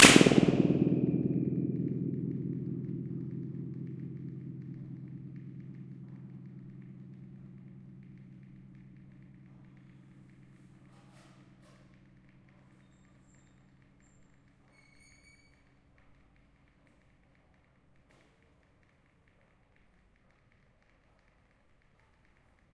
Balloon burst 4 at NationalTheatret Stasjon, Oslo
balloon, flutter-echo, norway, oslo, sound-sculpture, train-station